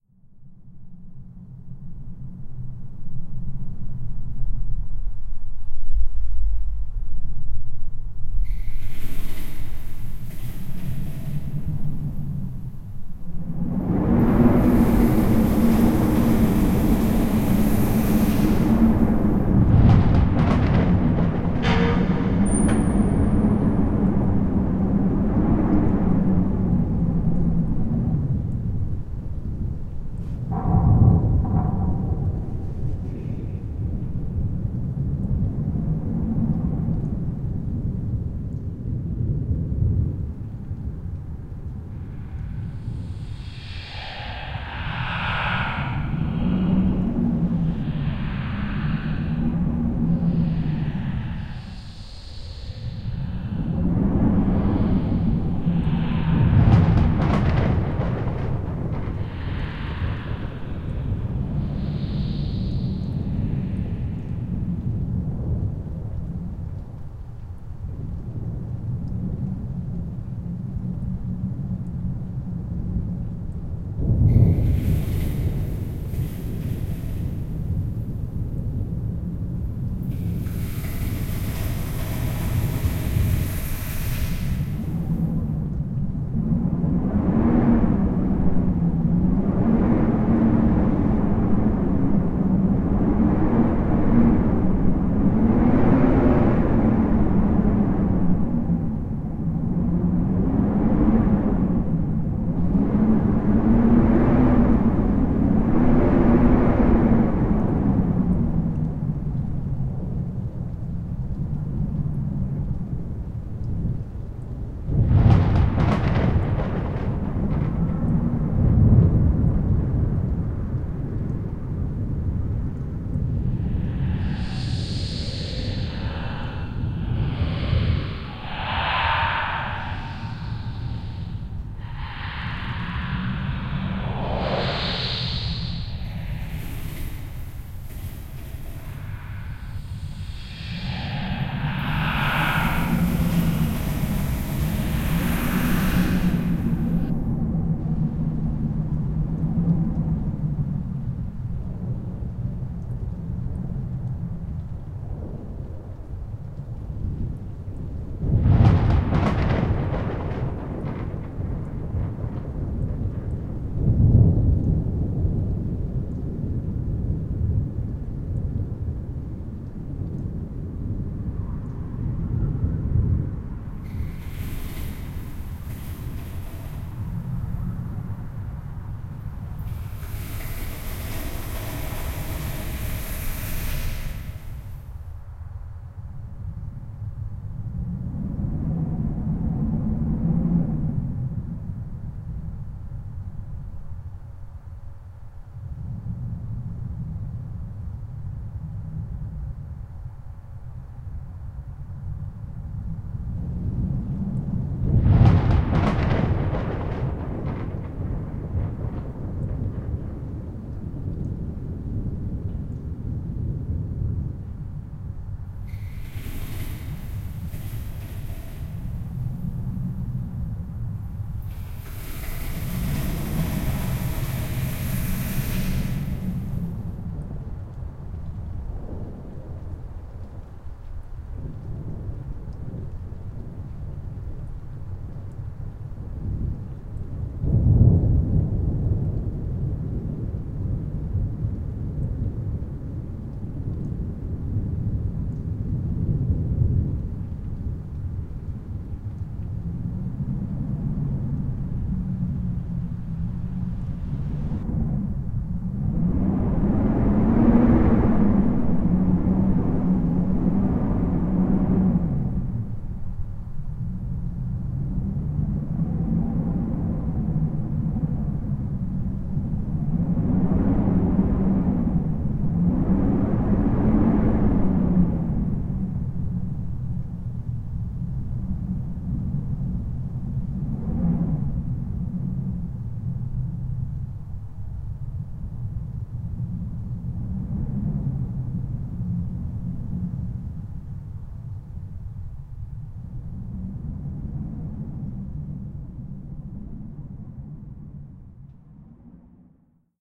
ambient ghost
background/ambient spooky/ghostly sound scape -
created for a theater performance.
based on wind howling -
spiced with thunder and ghost whisper and other strange sounds.
used sounds:
mystic wind howling
Ghost Whispers
Thunder big
Thunder 01
ambience, ghostly, remix, spooky, thunder, wind